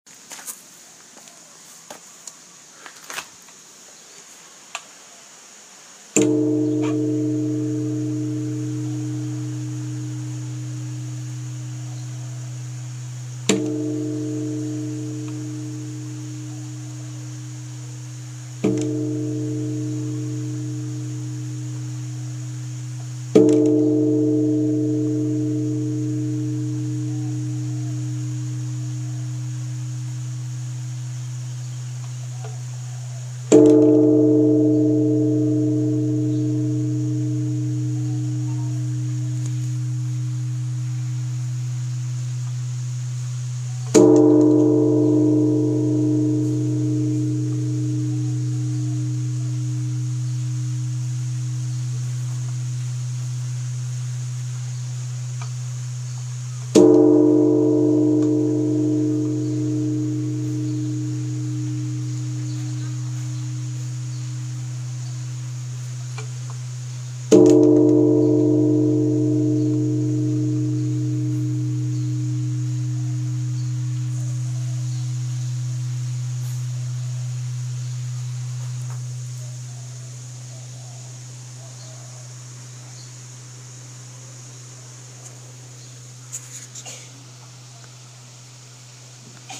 Temple Bell, Valley of the Temples
The bronze temple bell of the Byodo-in Temple in the Valley of the Temples Cemetery just outside Kaneohe, Hawaii. The bell was cast in Japan and had been installed in a free standing bell tower, as is customary in Japan, and can be rung by anyone who passes by. It's timbre and sound reminded me very much of the bronze bell in Hiroshima called the Peace Bell, which is in a tower directly across the river from the Technical School ruins.
bell, bronze, byodo-in, field, honolulu, kaneohe, oahu, recording, temple, temples, valley